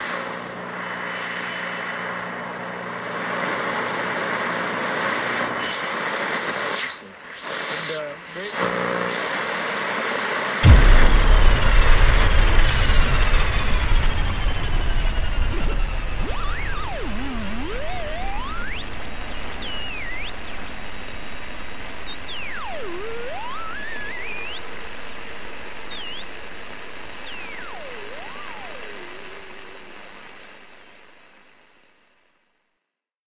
Day 7 7th July jobro Searching for a Beat
Day 7. Mix of various sounds from this pack by jobro:
Edited in Audacity.
This is a part of the 50 users, 50 days series I am running until 19th August- read all about it here.